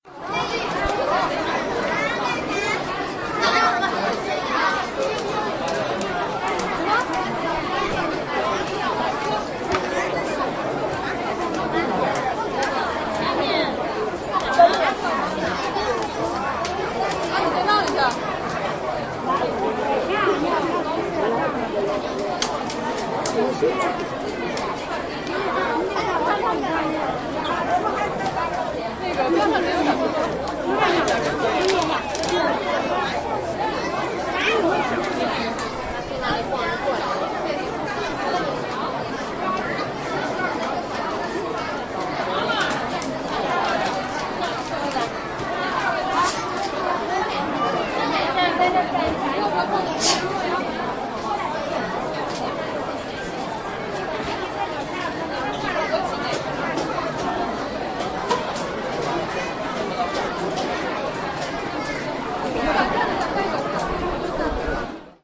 Chinese speaking crowd
A crowd speaking Mandarin, in Beijing's Jingshan Park -also knows as Coal Hill.
Ambience, Beijing, China, Crowd, Mandarin